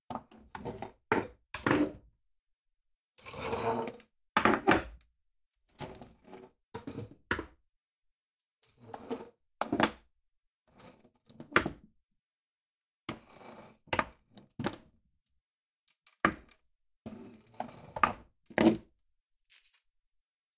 Wooden Chair - pull up a chair fx (lift, scoot, placement)
Pull up a chair and have a seat! A wooden dining room chair was used to lift, scoot, and place on a hard, concrete floor.
Gear: Zoom H6, SSH-6 Shotgun capsule, windscreen.